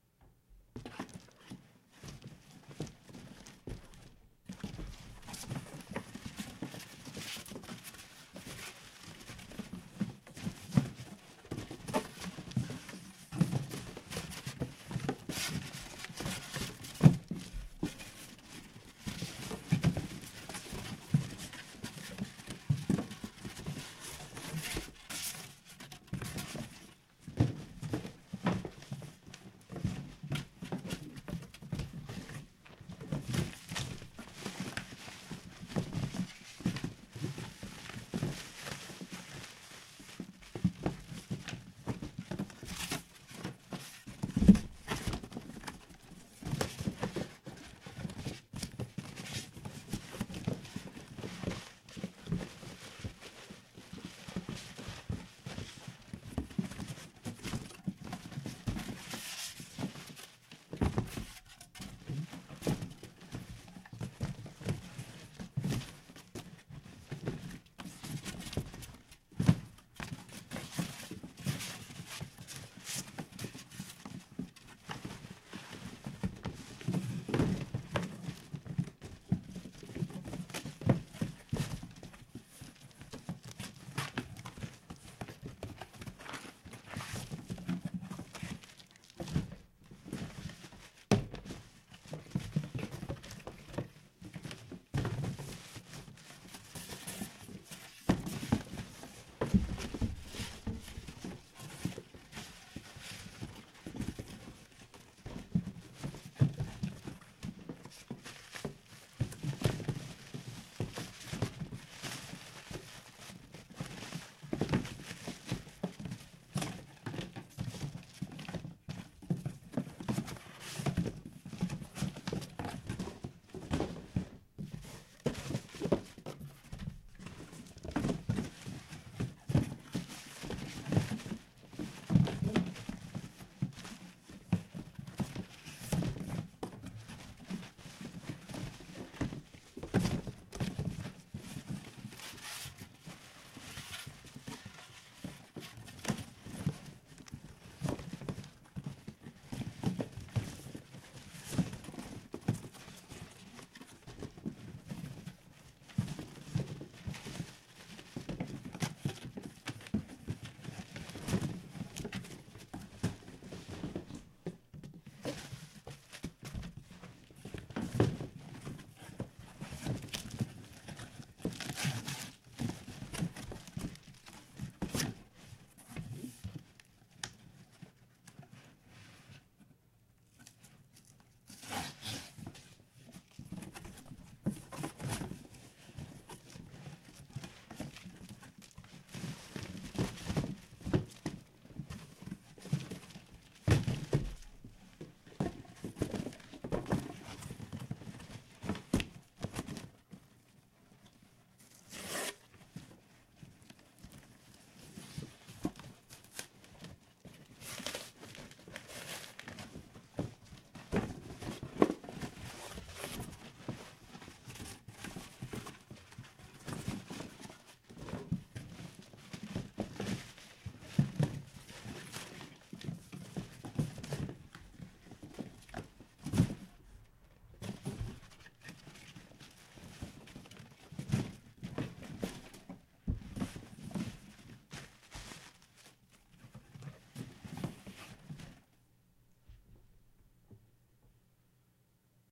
Rummaging through cardboard boxes
Rummaging through boxes with plastic bags, shoes and assorted Styrofoam objects inside. I used 3 largish boxes and rummaged through them, making rustling, scraping and plastic noises, as well as through them around a bit to make it sound like someone searching through boxes.
boxes, cardboard, rummaging, rustling, scrape, Styrofoam